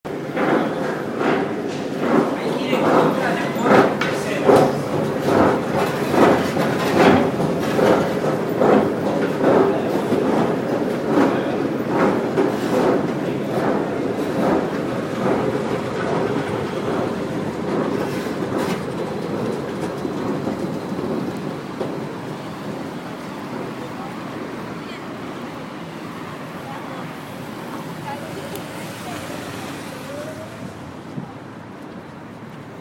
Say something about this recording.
Rollings stairs on Paris Metro
metro, Paris, rolling-stairs